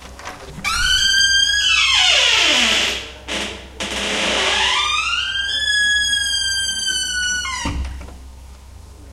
squeaky (toilet) door. Olympus LS10 internals. Recorded in Centro Andaluz de Arte Contemporaneo, Seville (Spain)
field-recording, mystery, rusty